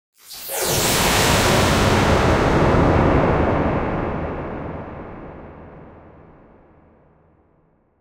etl Duck Explodes 24-96
Field recording distortion, processed, sounds explosive and sci-fi.